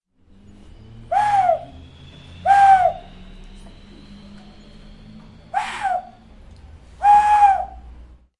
mySound-49GR-Ehsan
Sounds from objects and body sounds recorded at the 49th primary school of Athens. The source of the sounds has to be guessed.
49th-primary-school-of-Athens, Ehsan, Greece, mySound, TCR, whistle